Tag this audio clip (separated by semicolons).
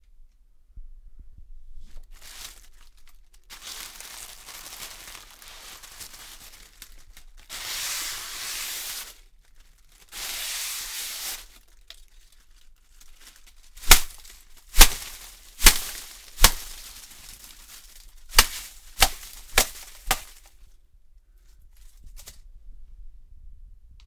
Dry; Flowers; Crunch; Break